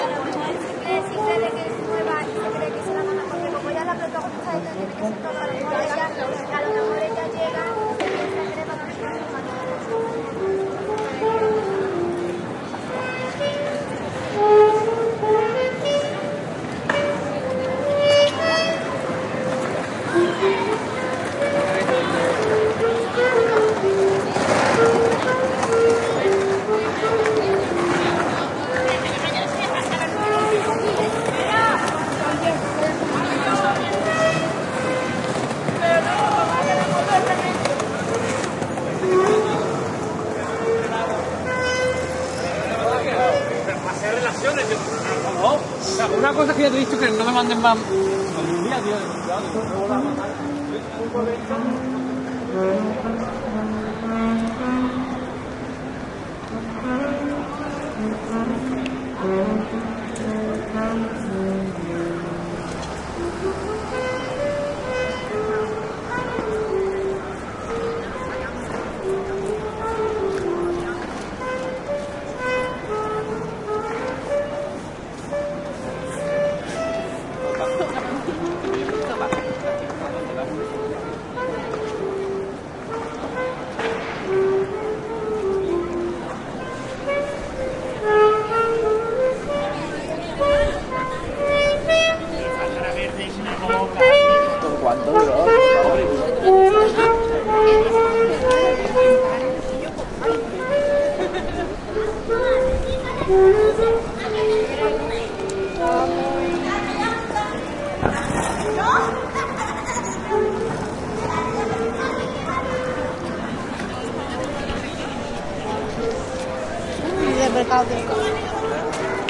street ambience, with a street musician playing saxophone, and voices talking in Spanish. Olympus LS10 recorder internal mics. Recorded in Avenida de la Constitucion, Seville, Spain